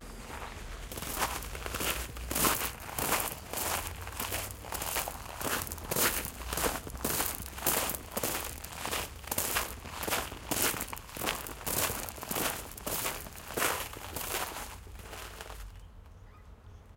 One of those accidental recordings, I was walking around recording bees in my back garden. Recording chain: AT3032 microphones - Sound Devices MixPre - Edirol R09HR